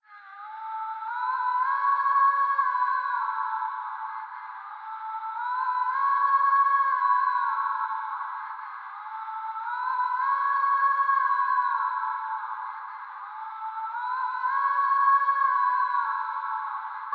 Eerie Female Background Vocals - C#min - 112bpm
emotional, vocal, spooky, eerie, cinematic, scary, voice, dark, girl, hip-hop, serious, trap, woman, rap, sad, female, hiphop, vox